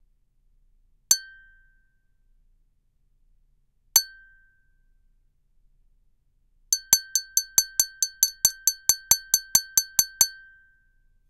COKE GLASS STRIKES 2
-Coca-Cola brand glass clanks
striking, coca, strike, cup, drink, glass, strikes